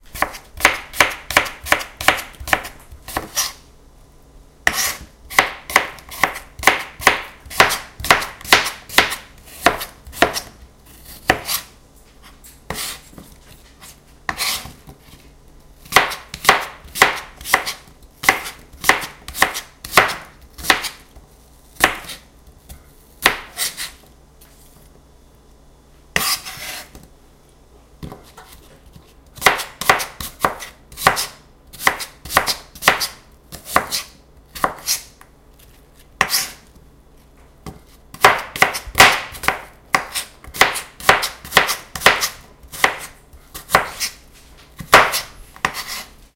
Chopping mushrooms with a chef knife on a big plastic cutting board. Recorded on November 24, 2016, with a Zoom H1 Handy Recorder.